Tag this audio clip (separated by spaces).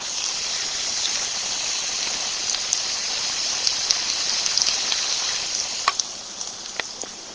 grill rain sizzle